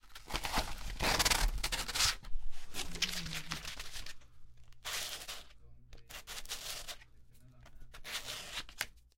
An extended version of the good old sandpaper.
scratch, sandpaper, foley